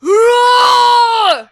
WARNING: might be loud
yelling out in anger